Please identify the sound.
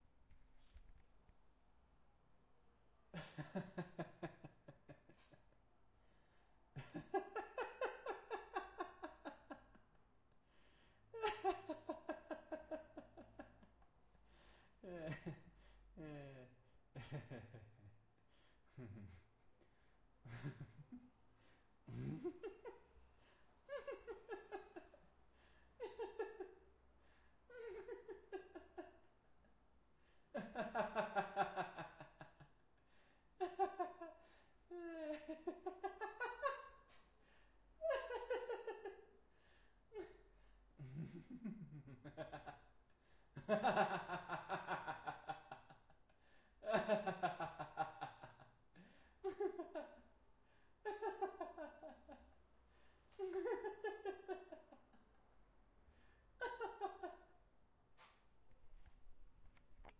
Man Laughts
A man laughs in many different ways. Recorded with Tascam Dr-40.
laughter, human-voice, man